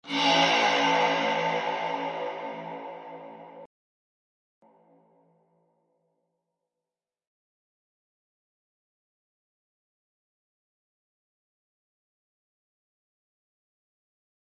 This sample was created in Ableton Live 9 using various synths and layering, edited in Ableton Live 9 and Mastered in Studio One.
additive-synthesis, distorted, distortion, fx, modulation, noise, sfx, sound-design, synth